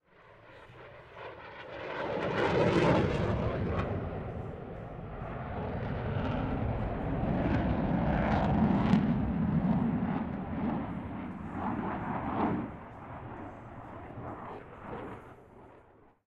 Eurofighter Typhoon Flyby 001 – Close Proximity
A recording of a flyby of a Eurofighter Typhoon – a modern jet engine fighter airplane – at an airshow in Berlin, Germany. Recorded at ILA 2022.